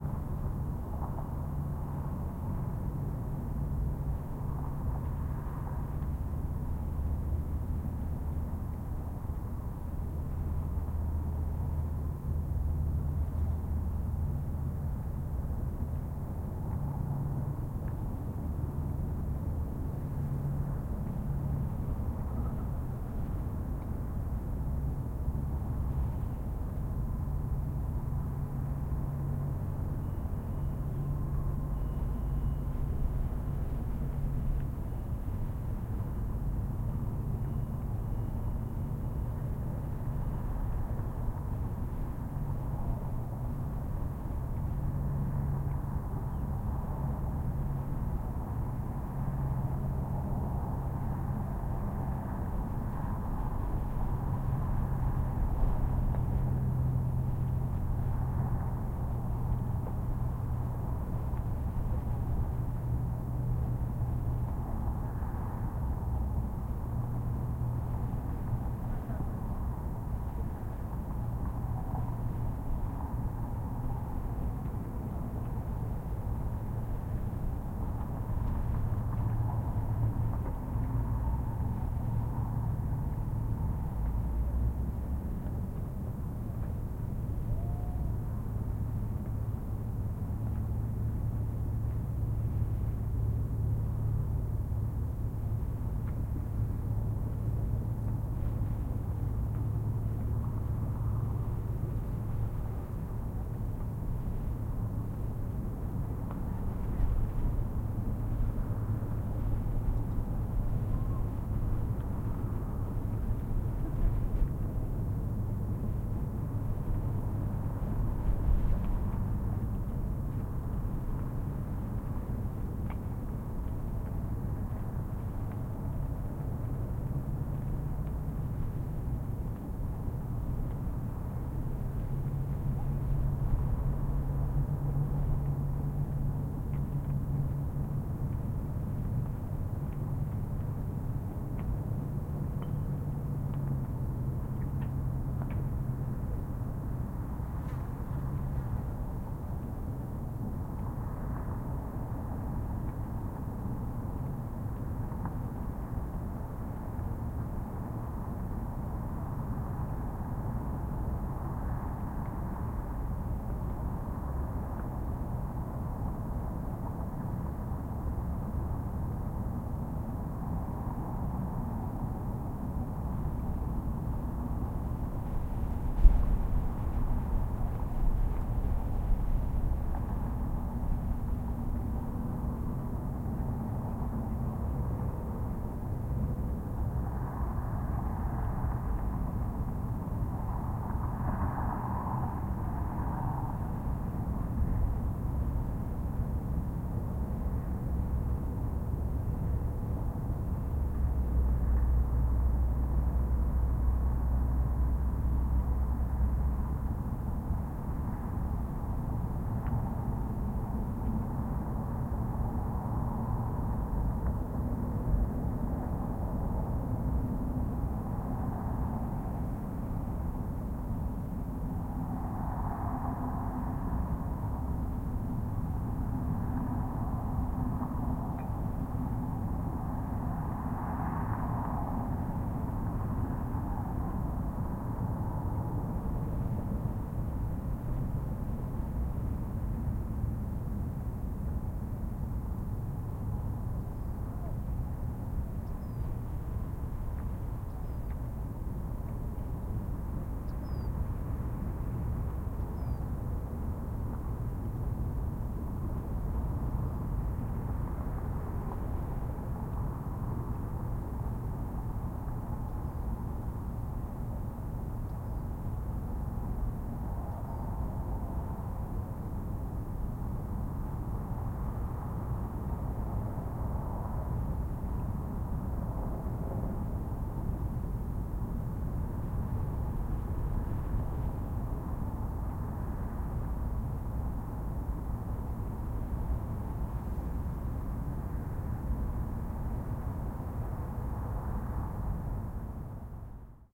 2012-11-24 12am AMB - PALM SPRINGS from Rock
Field-recording Ambience Palm-Springs Rock Desert